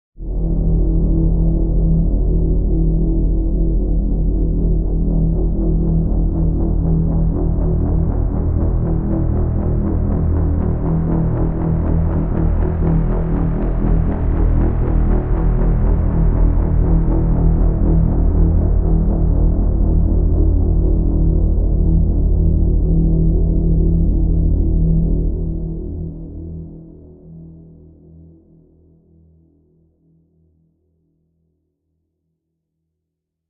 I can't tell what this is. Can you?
A long low drone with a swelling pulse in the middle